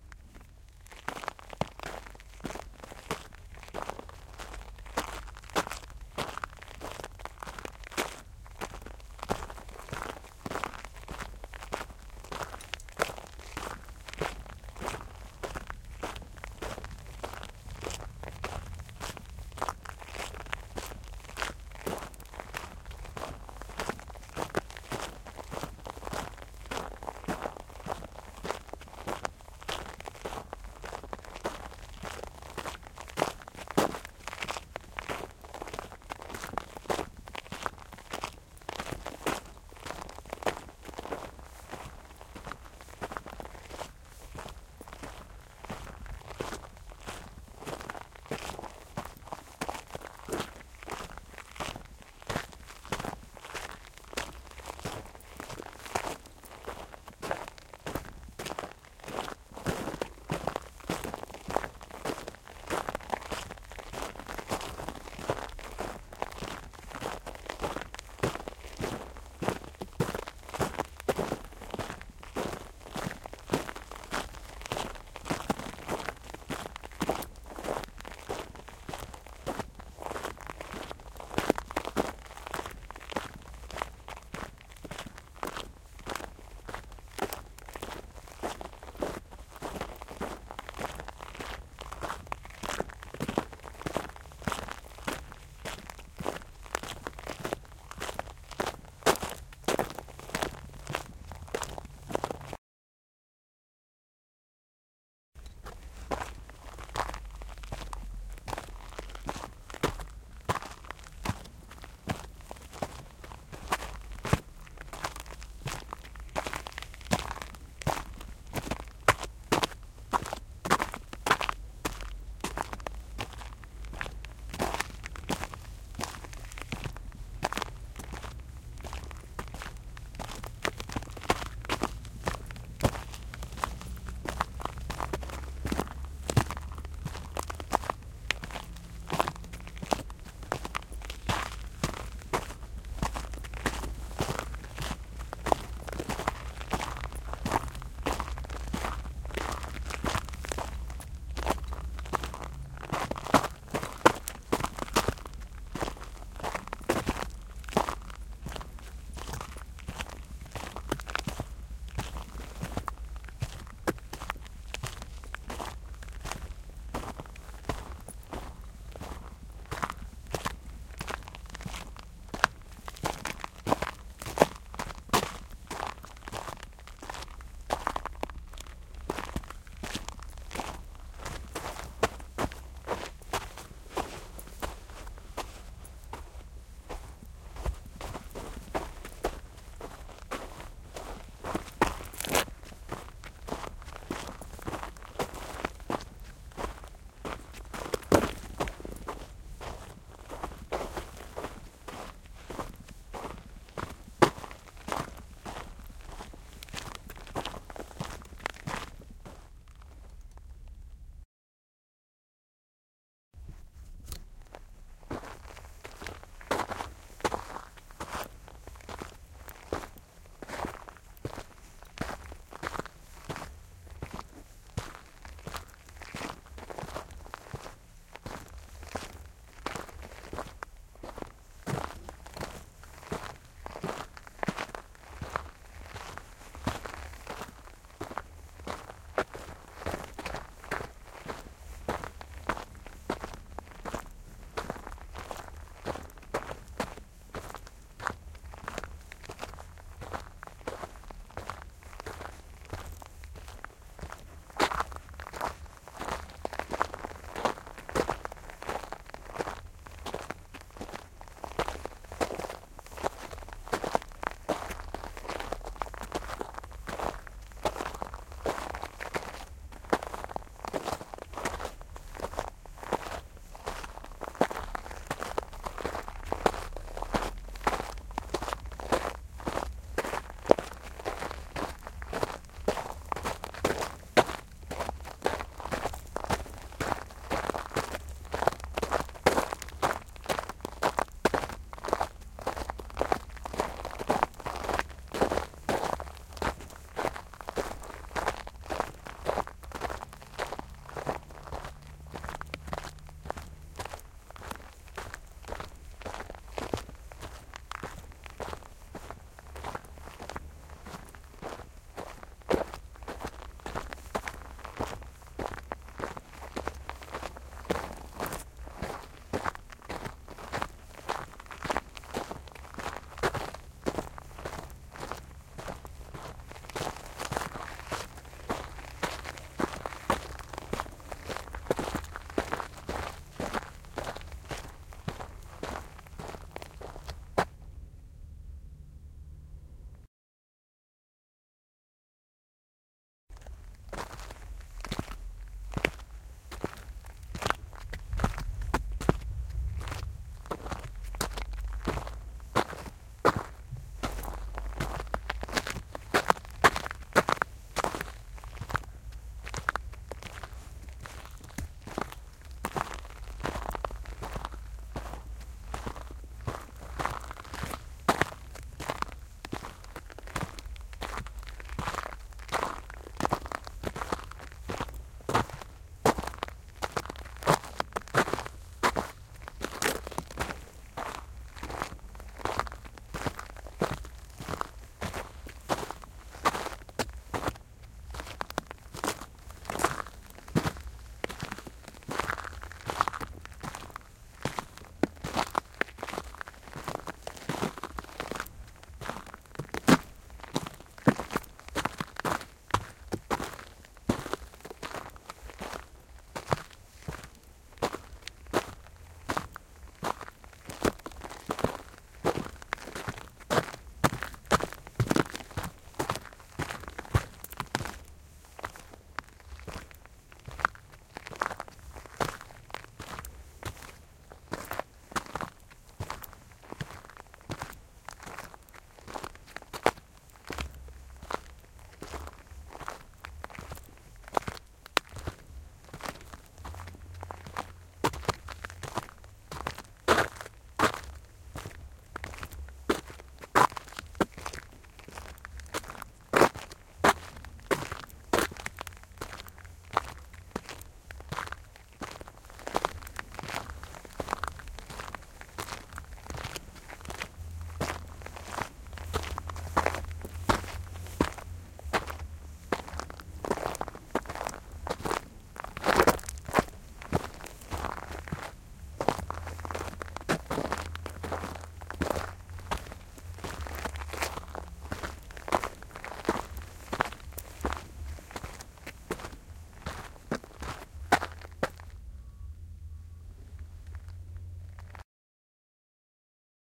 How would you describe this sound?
Footsteps in the desert
Walking in the desert or on dirt 4 versions: normal, slow and insecure, slow, normal insecure to quick stepping.
Recorded on a MixPre6 with LOM Uši microphones.